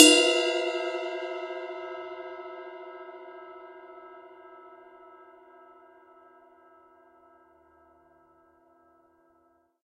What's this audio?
ride bell3
X-Act heavy metal drum kit. Zildjian Avedis Rock Ride 20". All were recorded in studio with a Sennheiser e835 microphone plugged into a Roland Juno-G synthesizer. Needs some 15kHz EQ increase because of the dynamic microphone's treble roll-off. I recommend using Native Instruments Battery to launch the samples. Each of the Battery's cells can accept stacked multi-samples, and the kit can be played through an electronic drum kit through MIDI.
ride, rockstar, tama, zildjian